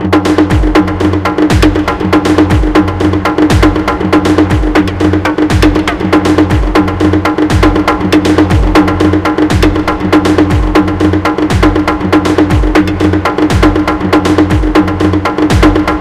this is one of the loops i like a lot when playing for fun in ableton live.
its a drumsequence i made in reason.
i like this loop.
inspired by mayumana and stomp.
now i hope u ve fun with it,too.
best wishes and greetings from berlin!
ciao
reverb, trance, conga, delay, reason, loop, drums, drumloop, sequence, synthetic, drum, electro, tekno, bongo, bass